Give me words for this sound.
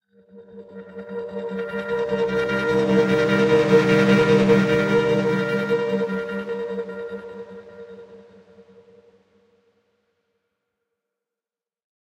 Cyber Swoosh 21
A sound that is ideal for video transitions. Made using the program Ableton Live.
woosh, Transition, sfx, sound, swish